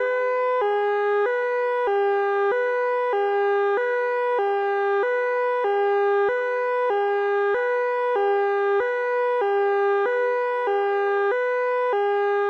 Krankenwagen.
Made with an Arp Odyssey (synthesizer)
ambient, arp-odyssey, sounds, synth-made